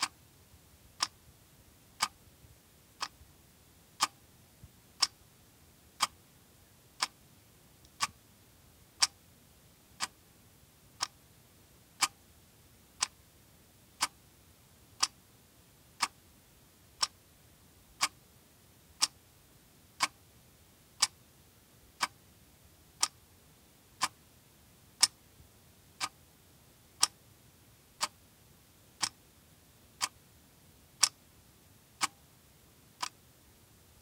Plastic Quartz clock ticking
Cheap plastic clock ticking, close perspective